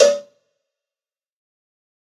Dirty Tony Cowbell Mx 037
This is Tony's nasty cheap cowbell. The pack is conceived to be used with fruity's FPC, or any other drum machine or just in a electronic drumkit. ENJOY
cowbell, realistic, drumkit, drum, dirty, pack, tonys